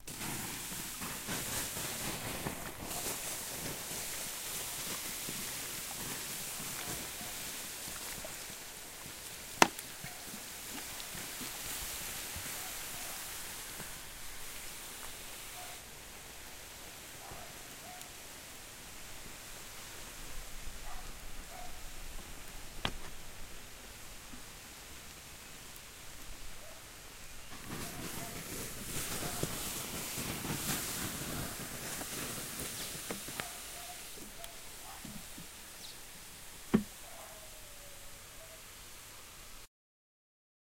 Putting out fire with water
out, fire, Putting, OWI